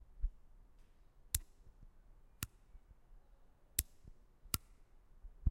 The sound of a switch "click clack"
Click, button, clack, hit, impact, short, switch